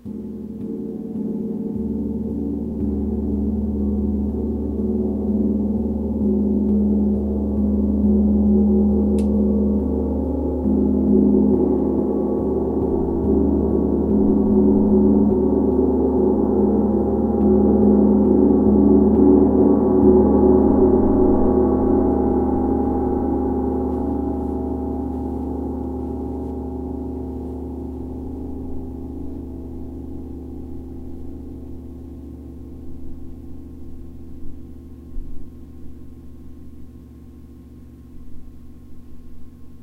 Short burst of sound from rapid beats on 30-inch diameter Ziljian gong, struck with hard 3 1/2" diameter yarn-wrapped Ziljian mallet. Recorded with Zoom H4N located 8-feet directly in front of gong, 2-feet off floor.